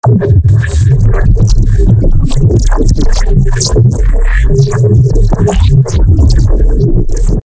Sine Compression 1
The original source was a sine wave which I then pitched around, and compressed unbelievably. It makes the sine wave full spectrum. I recorded that and then I granulised. After that, I put it through a bunch of notches and a bit more compression. This was over the course of a couple resamples. Sine compression is so damn fun.
3xosc, bass, compression, fl-studio, granulisation, neuro, sine